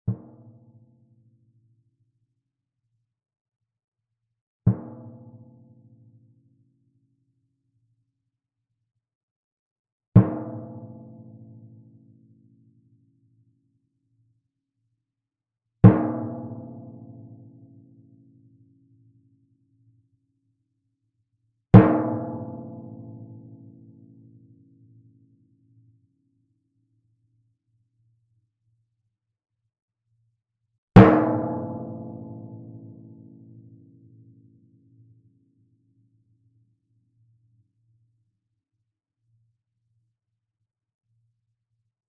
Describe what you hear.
timpano, 71 cm diameter, tuned approximately to A.
played with a yarn mallet, directly in the center of the drum head.